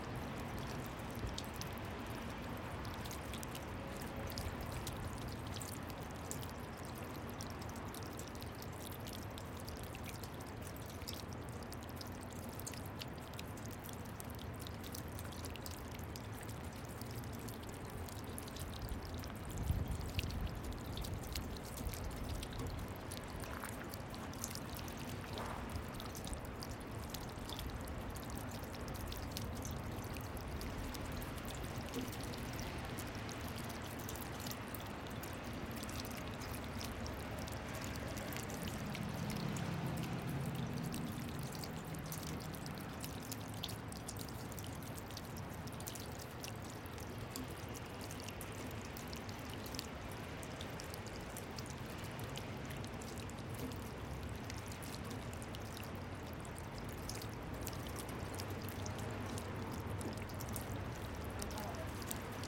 THE RATT22 1
Recorded with a MKH60 to a SoundDevices 744T HD recorder. I pointed the mic to the hole of a drainpipe and the small pool below.
drainpipe, light-rain, water